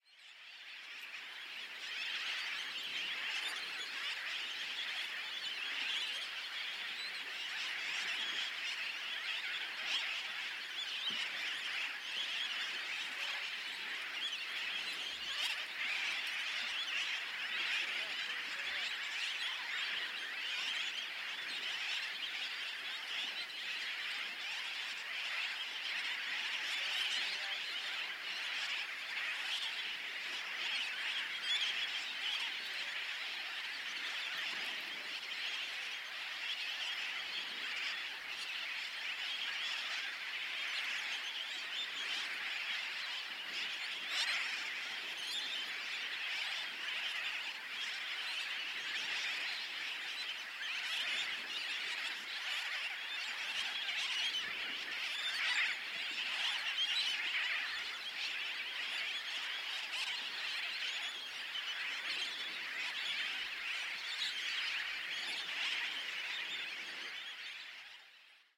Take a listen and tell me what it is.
Massive colony of sea birds recorded on Bush Key in Dry Tortugas National Park. Recorded with Zoom H1, HP filtered in Pro Tools to reduce wind noise.